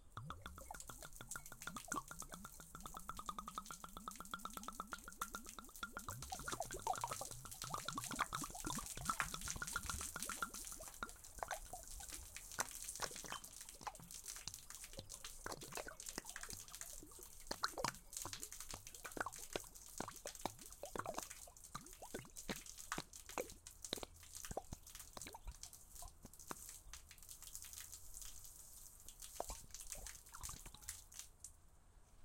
Liquid Gurgle Pour Splash FF209
Liquid pour, gurgle, sloshy, on concrete, glug, light splashing, steady
concrete, glug, Liquid, pour